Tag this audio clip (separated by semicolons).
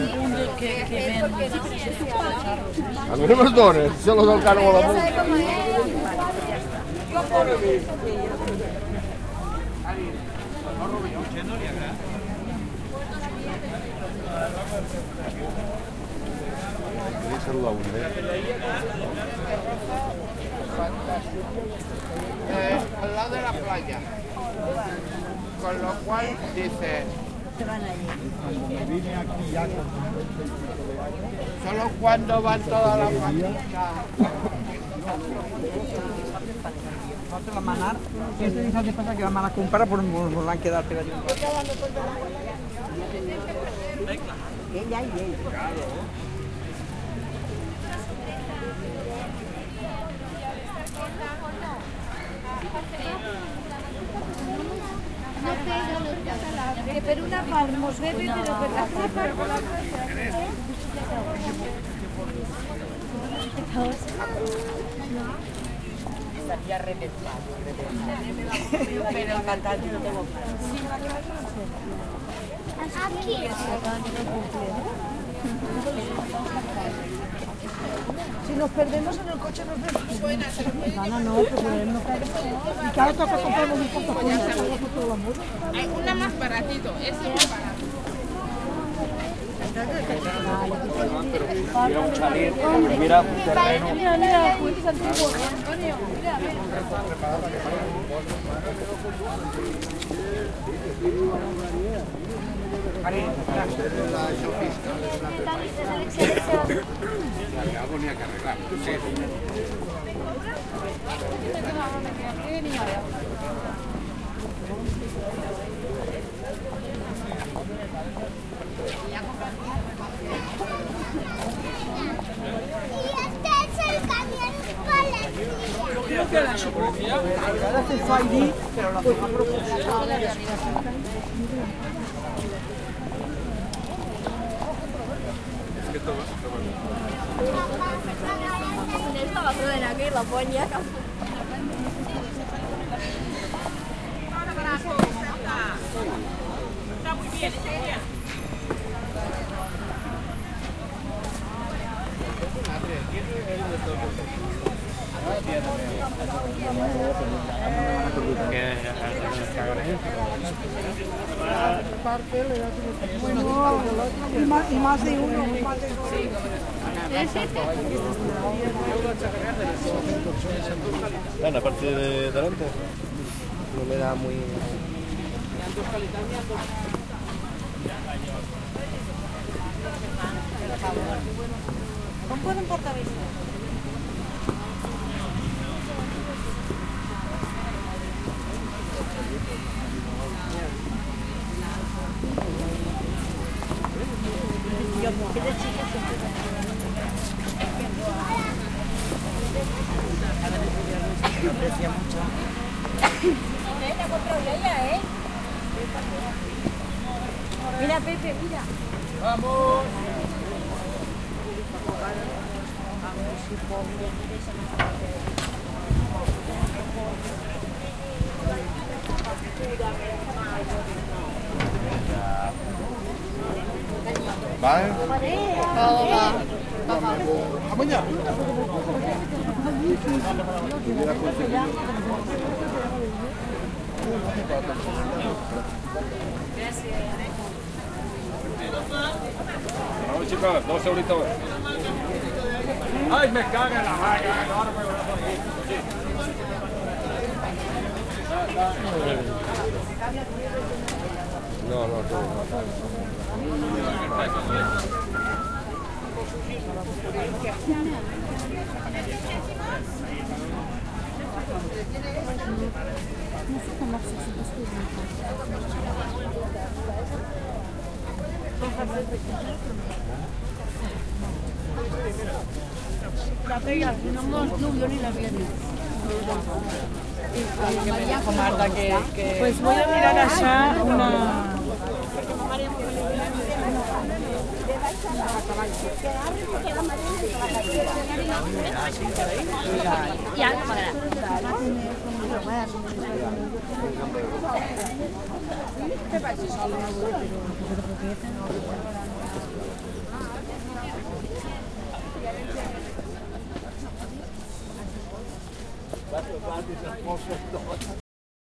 background-talking; crowd; fuss; Gandia; market; people; selling; Spain; street; talking